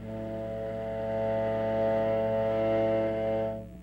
Recorded on a Peavy practice amp plugged into my PC. Used a violin bow across the strings on my Squire Strat. This is an A note played on the 6th string.
experimental, real